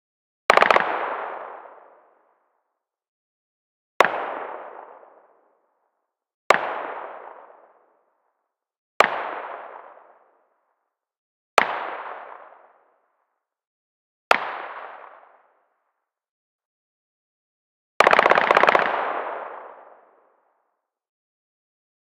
Made in ableton live, automatic fire in distant. Transient click layered on top of gun echo and made into a loop with minor pitch changes to simulate variation in tone.